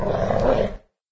A dog growl from a labrador retriever.
Animal, Big, Dog, Growl, Labrador, Labrador-Retriever, Retriever